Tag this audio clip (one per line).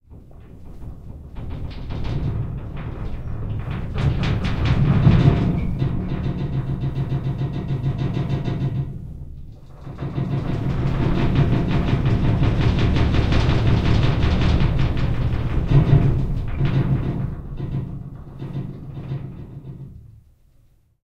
angry
danger
dangerous
device
horror
massive
mechanical
mechanism
quake
rumble
rumbling
scary
shake
shaking
slow
technological
waggle
wobble